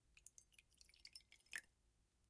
Pouring a shot into a small glass shotglass.